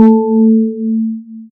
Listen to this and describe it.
synth ping 1
i made this sound on audacity, im just trying to figure out how overtones and wave phases interact with each other, and uploading results (if i like em), lemme know what you think, and if you have any pointers ill be more than happy to listen
distort, pad, slight, synth